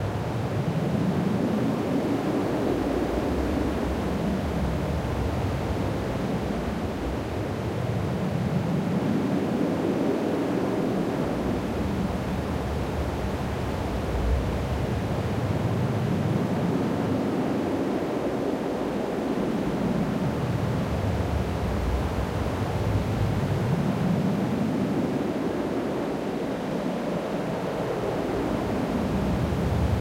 windmaschine+mr noiser01-layered
Synthetic wind created with the "Windmaschine" & "MR_Noiser01" ensembles for Native Instruments Reaktor.
ambiance, ambience, atmosphere, breeze, environment, hissing, noise, reaktor, synth, synthetic, whoosh, wind